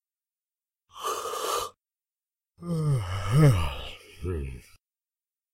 Yawning Man
Man yawning. No effects except Noise Reduction. Recorded at home on Conexant Smart Audio with AT2020 mic, processed by Audacity.
bored, boring, man-yawns, sleep, sleepy, tired, yawn, Yawning